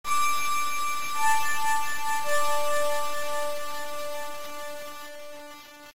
Starry Night
Sounds from a small flash game that I made sounds for.